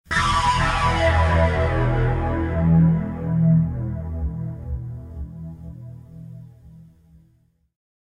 A low E played with Zynaddsubfx with flanger and compressor.